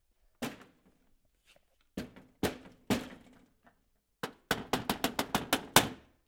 Mlácení do skříně
Sound, which you can hear, when somebody has got problems with PC
cage, PC, Rage, sounds